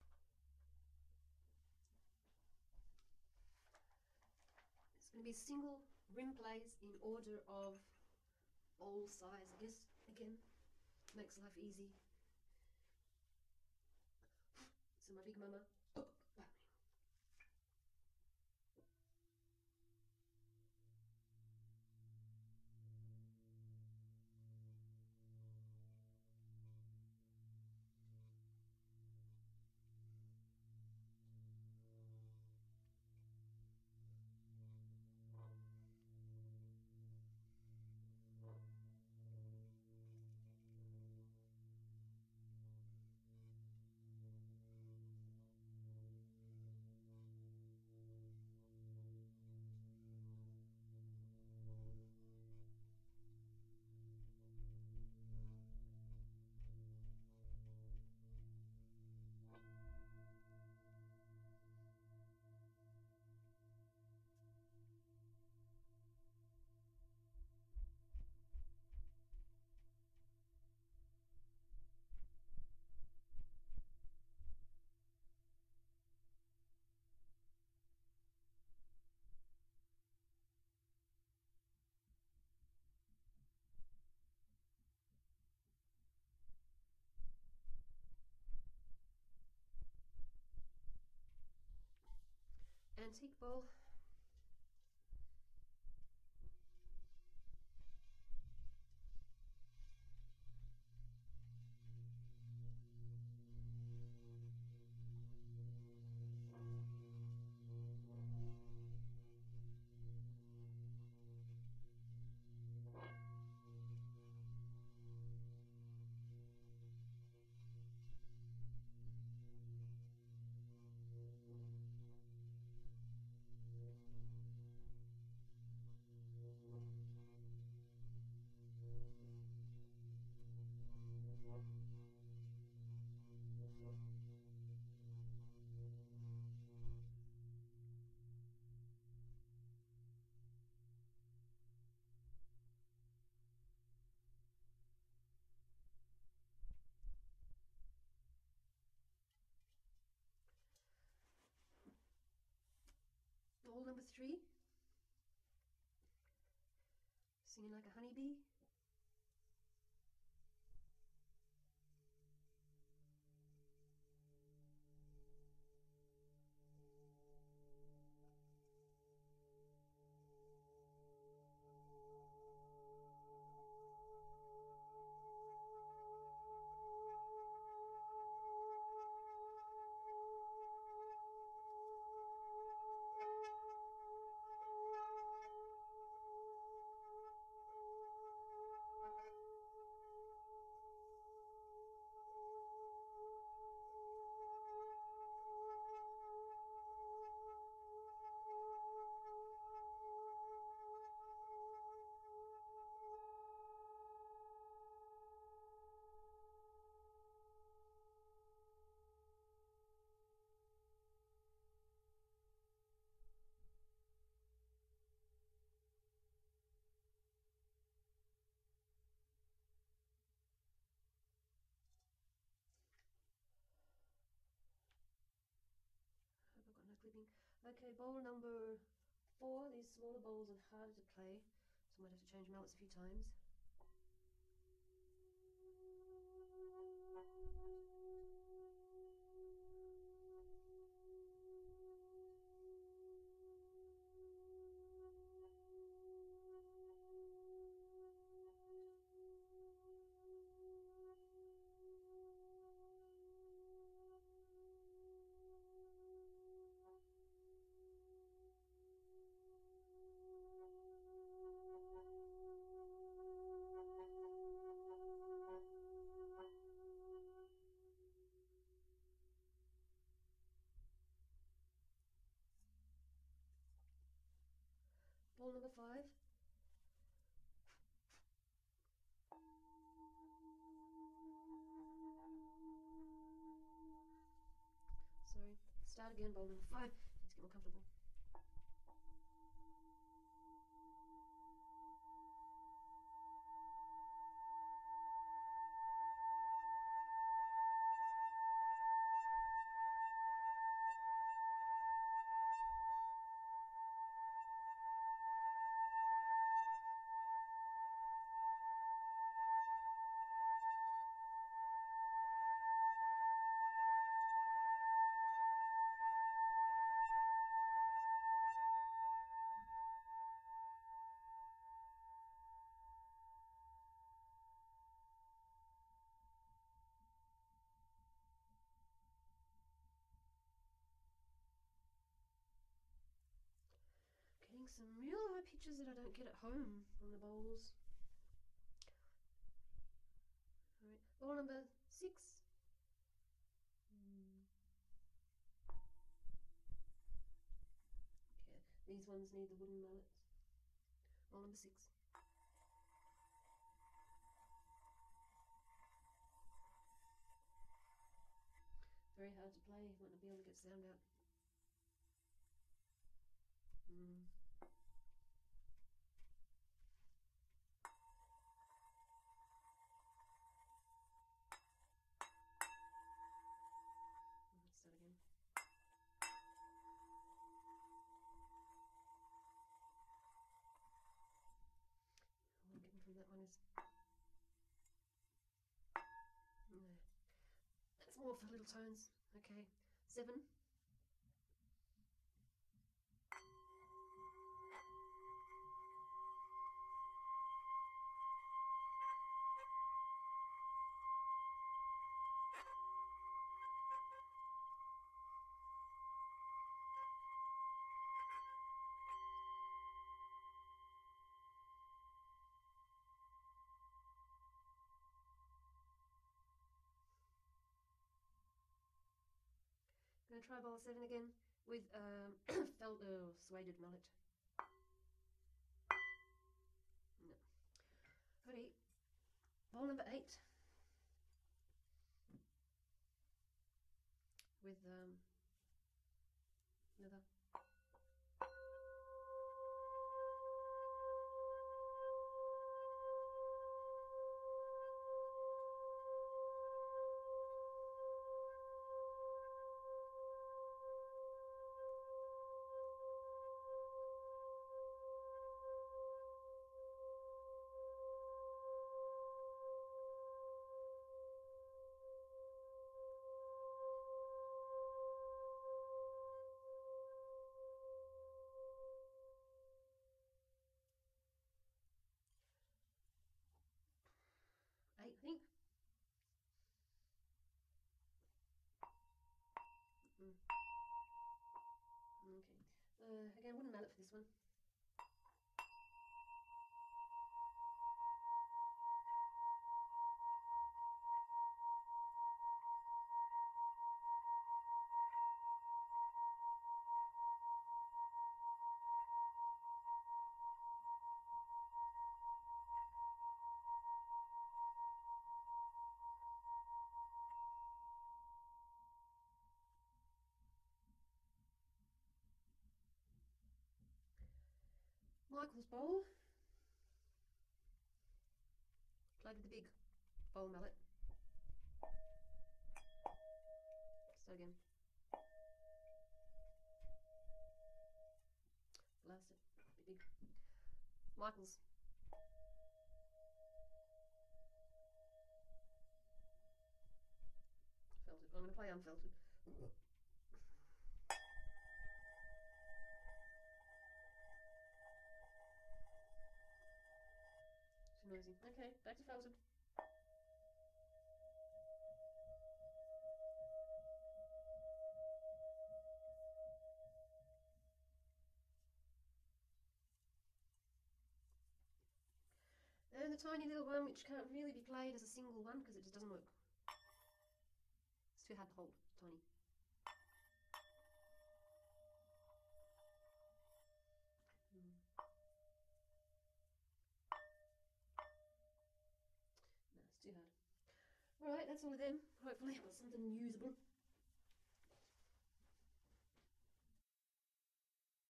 single rim plays all
all Tibetan bowls, single rim plays, mixed pitches, many in one sample file
Tibetan-instruments, Ambience, Tibetan, healing, bowls, music